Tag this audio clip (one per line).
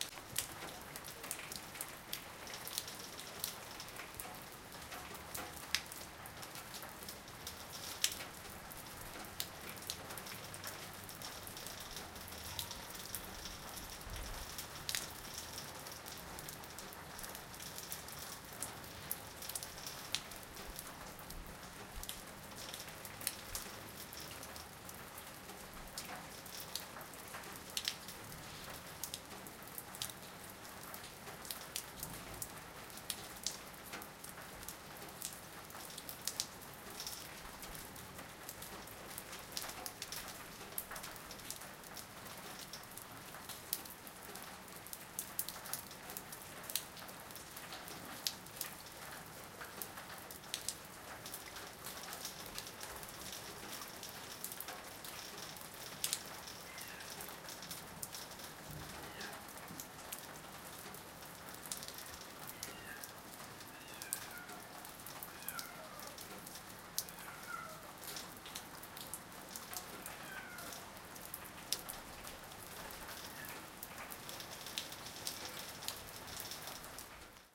drop
hood
concrete
water
rain
roof